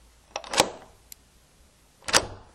The sound of a door lock
being applied and undone, respectively.
door, close